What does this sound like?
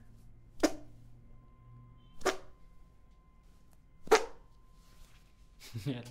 Fake farts made with the armpit.